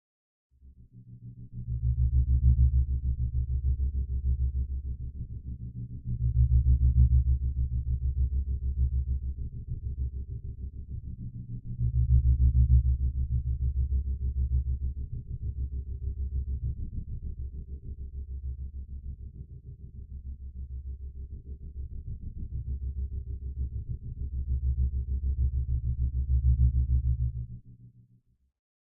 Alien Drone - Deep oscillating bass
Alien Drone ship, oscillating bass frequencies, deep.
alien, bass, creepy, deep, drone, frightening, horror, low, oscillating, rumble, sinister, spooky, suspense, terrifying, terror, thrill